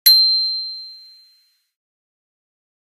bicycle-bell 05
Just a sample pack of 3-4 different high-pitch bicycle bells being rung.